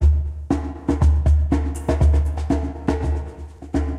LoFi Djembe Grooves I made, enjoy for whatever. Just send me a link to what project you use them for thanks.
LoFi, Djembe, Acoustic